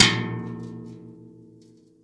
recordings from my garage.
industrial, metal, machine